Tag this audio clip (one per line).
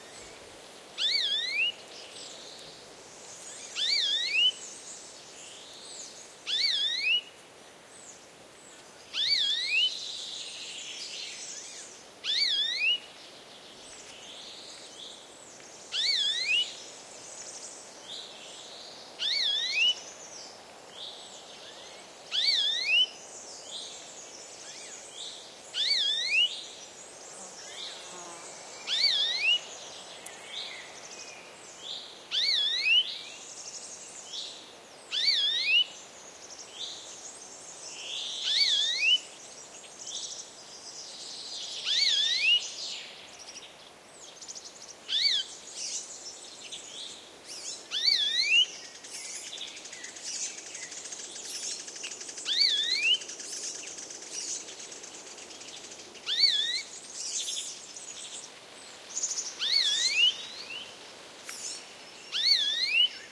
insects
pine-forest